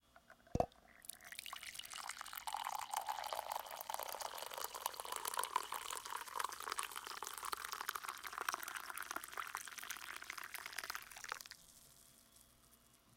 pour a cup of coffee
POured into a heavy mug